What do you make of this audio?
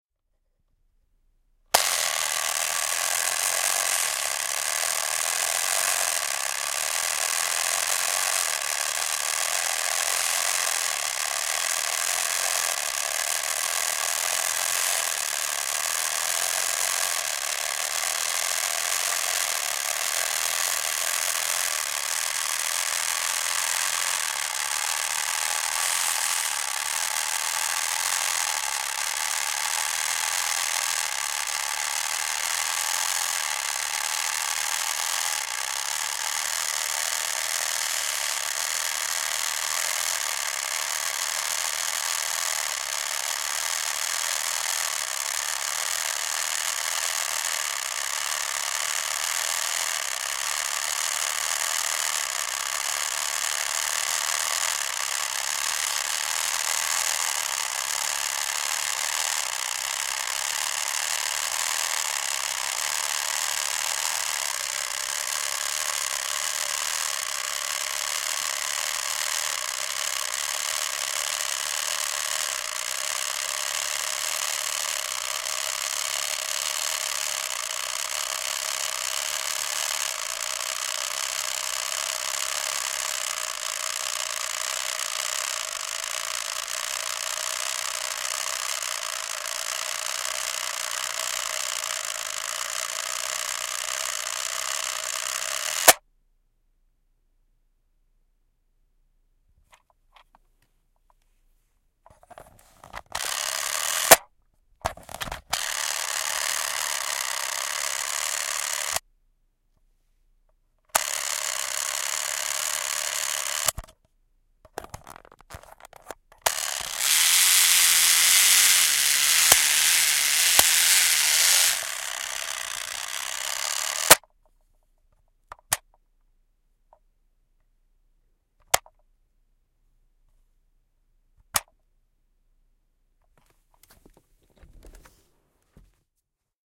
Board Game Timer Egg Timer
Sound of the timer used for a board game, similar to an egg timer.
Egg-Timer, Gears, Timer